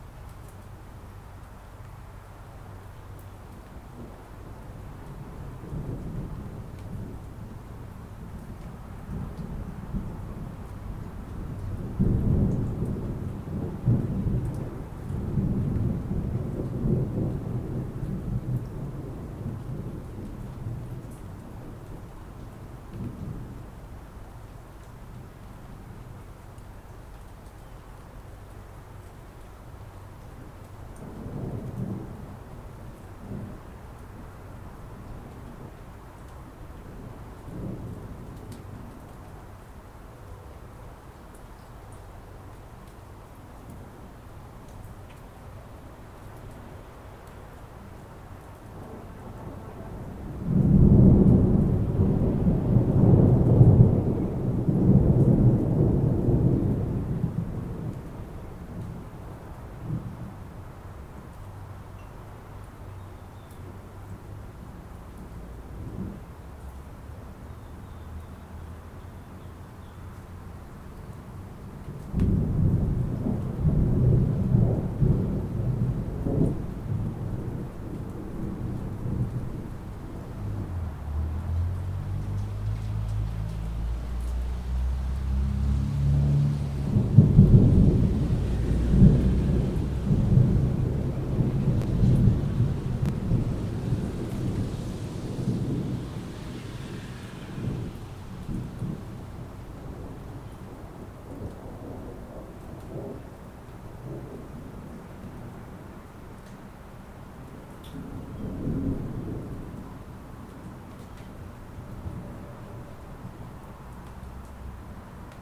USB mic direct to laptop, some have rain some don't.
field-recording, storm, thunder